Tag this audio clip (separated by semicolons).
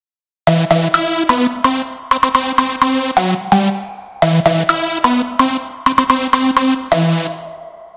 lead; synth; pluck; bigroom; drop